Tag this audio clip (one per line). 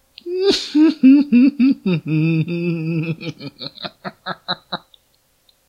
Halloween
evil
laugh